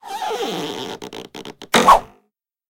Foley sound effect made for theatre and film. Recorded with Akg 414 and mixed in Cubase.